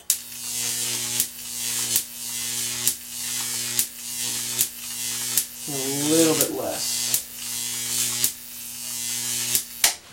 Short recording of a Jacob's Ladder constructed by a friend of mine.
This was taken from the audio track of a video shoot. Recorded with the internal microphone of a Sony DCR-TRV8 Handycam.
Still frame from the video: